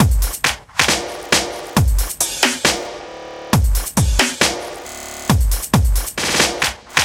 Kick ass Drum Loop